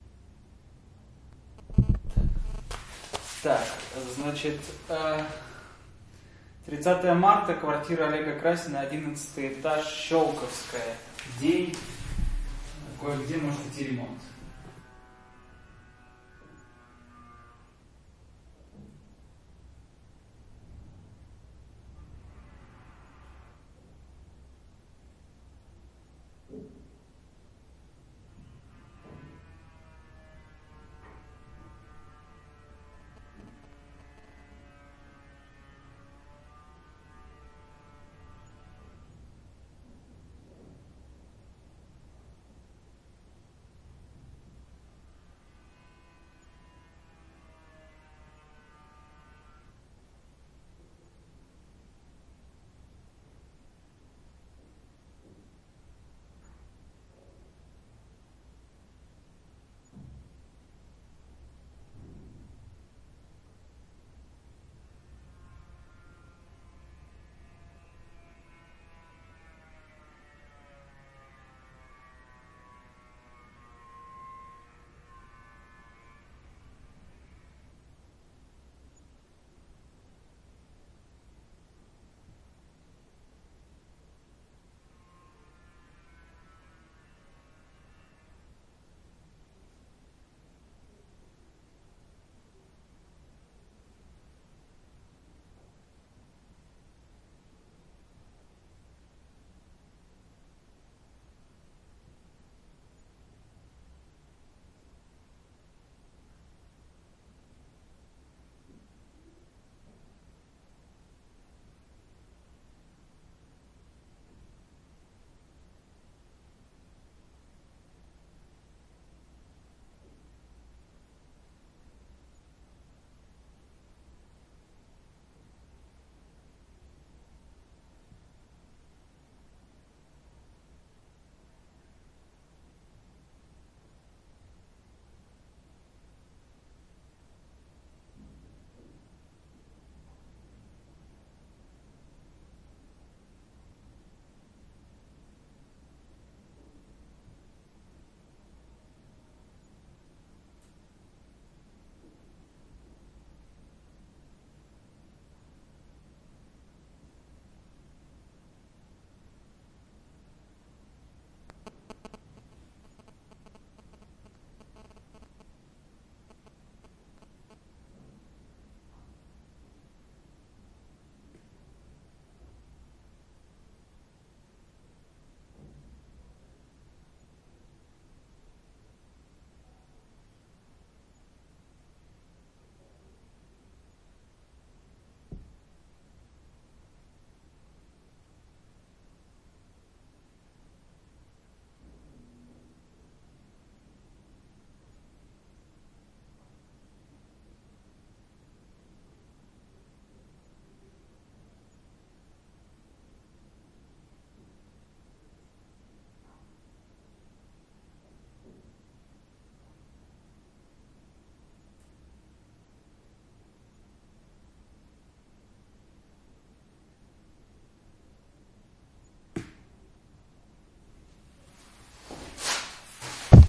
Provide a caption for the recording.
MS Roomtone 11floor Moscow Schelkovskaya-District
Just roomtone (Moscow, Day, summer, suburbs, flat) and my voice description (:
Recorded on the Zoom-H6 with MS-mic.
interior, roomtones, atmos, atmosphere, Moscow, background, ambience, roomtone, ambiance, Russia